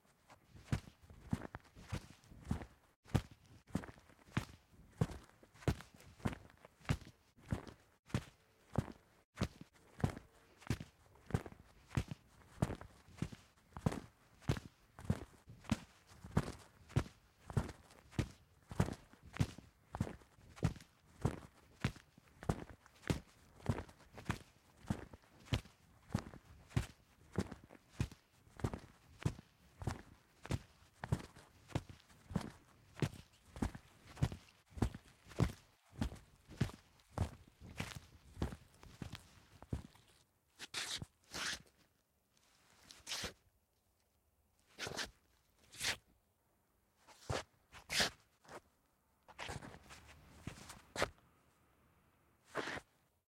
footsteps pavement street
footsteps on a wet pavement (very close perspective), wearing leather shoes.
EM172 (on shoes)-> Battery Box-> PCM M10.